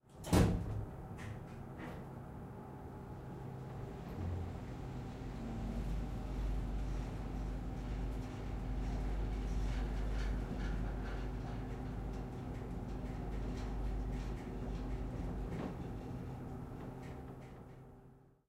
elevator travel 4
The sound of travelling in a typical elevator. Recorded in an apartment building in Caloundra with the Zoom H6 XY module.
elevator, mechanical, moving, travelling